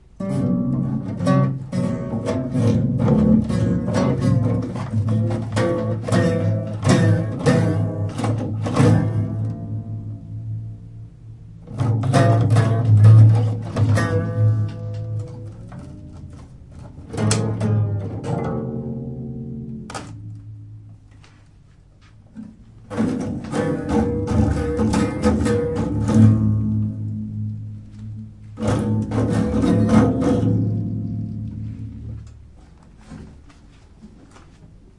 Broken Guitar
An old, broken acoustic guitar giving in to my attempts to tickle some musical notes out of it.
Recorded with Zoom H2. Edited with Audacity.
acoustic, broken, cheap, destroyed, glitch, guitar, instrument, junk, old, poor, trash